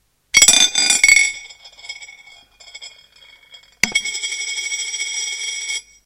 Coins from some countries spin on a plate. Interesting to see the differences.
This one is an old 10 pfennig.